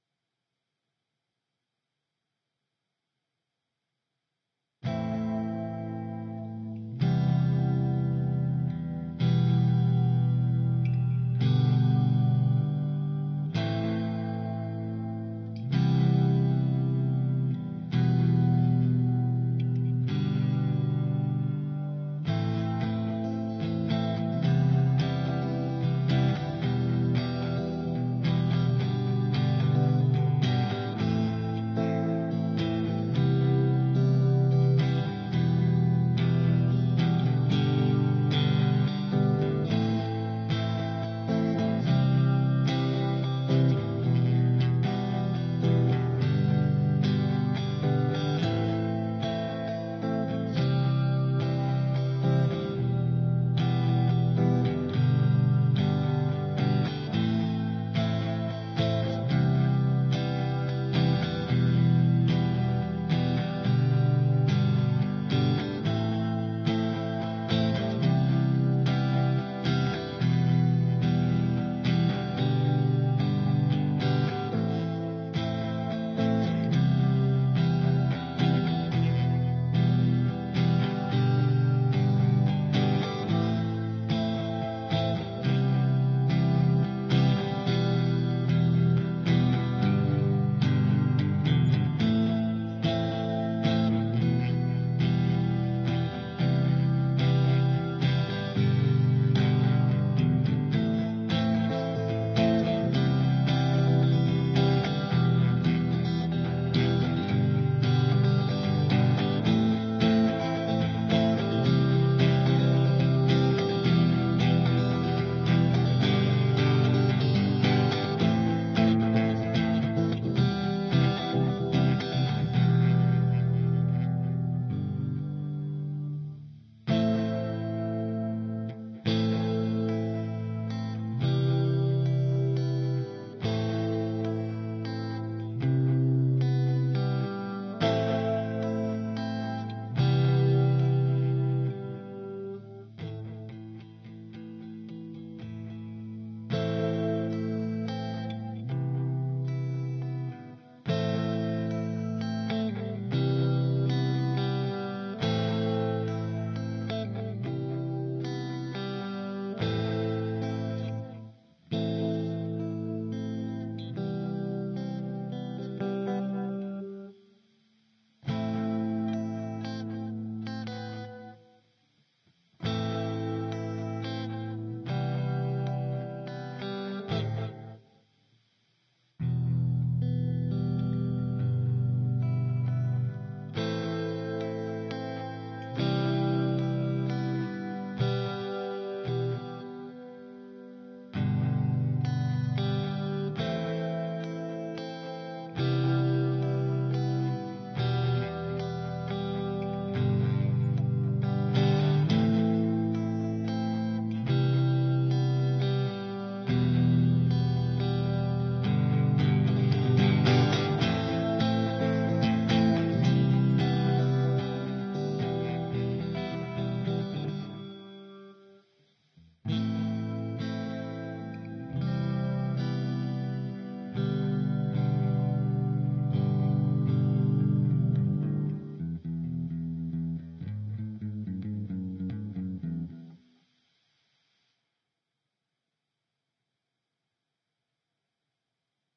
Guitar chords with reverb
chords, reverb